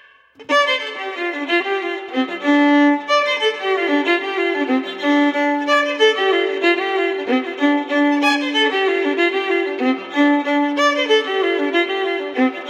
Mournful fiddle
Just a sad mournful violin sound, might be good as background music for a sad scene
cry, fiddle, sad, strings, violin